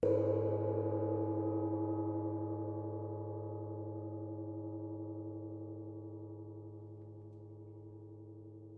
Soft Plosive 1
A soft plosive-sounding gong strike sample
Ambient, Gong-strike, Samples